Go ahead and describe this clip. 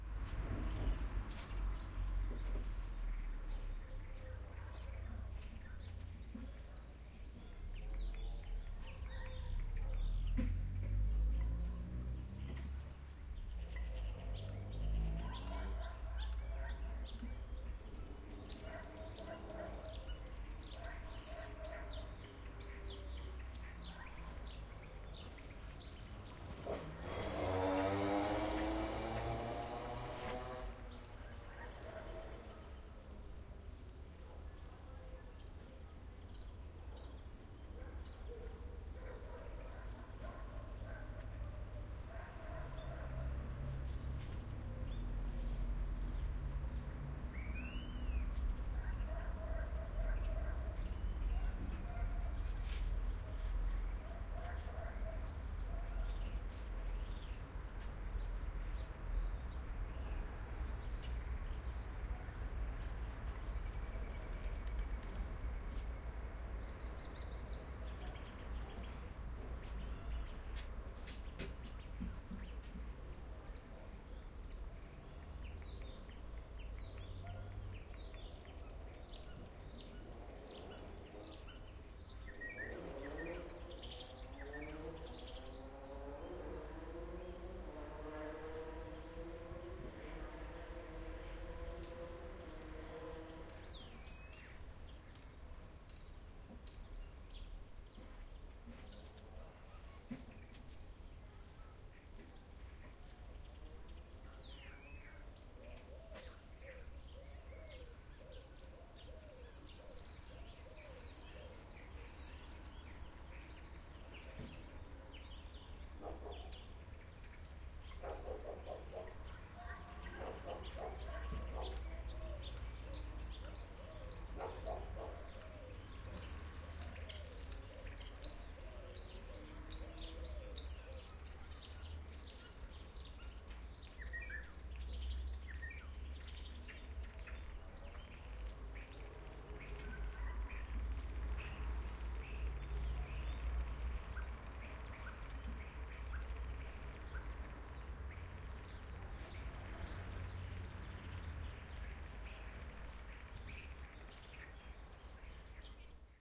Matí a l'Ametlla del Vallès, part 1
Field-recording in a quiet street in l'Ametlla del Vallès (Barcelona, Spain). Some bird sounds can be heard rather closely, dogs barking and cars passing by in the distance. Recorded with a Zoom h4n on January 2017.
ambience, birds, cars, distance, dogs, field-recording, nature, quiet, zoom-h4n